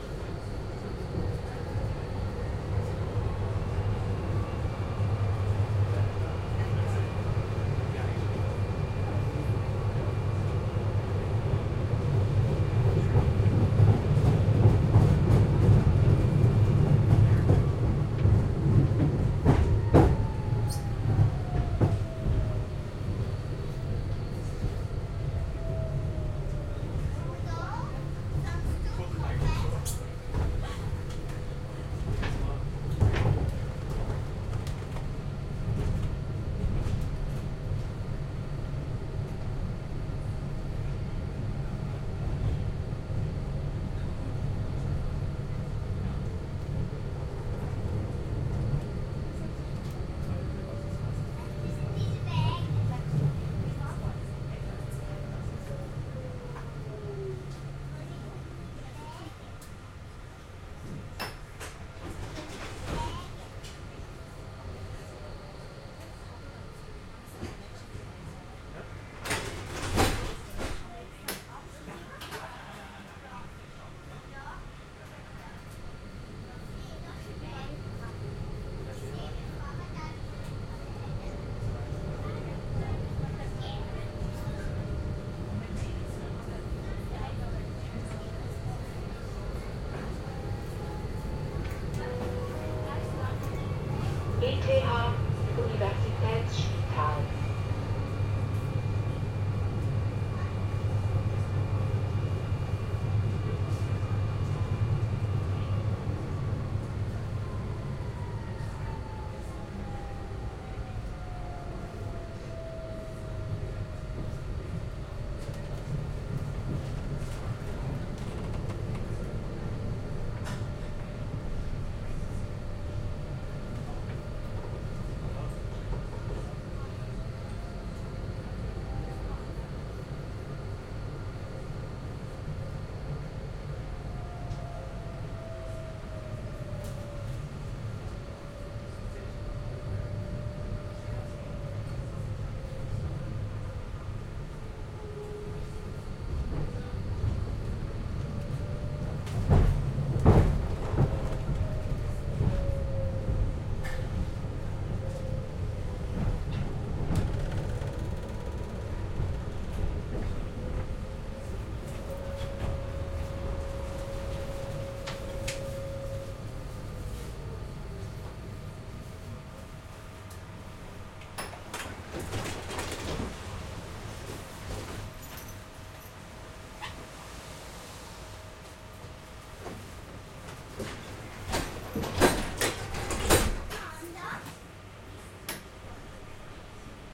inside a tram in Zurich, Switzerland.
ambience, inside, public, rail, train, tram, transport, transportation